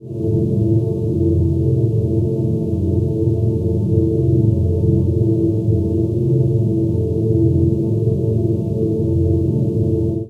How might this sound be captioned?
pad gas03
yet another deep pad in best GAS manner.
ambient, gas